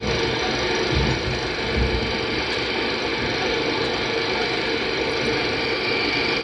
04 - Projector working
16mm projector working - Brand: Eiki
Proyector de 16mm en funcionamiento - Marca: Eiki